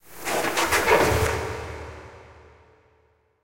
DarkFX#110 SoundSmith
A dark organic mechanical sound created from original recordings. Enjoy!!
horror, machine, mechanical, organic, robot, scary, sudden, surprise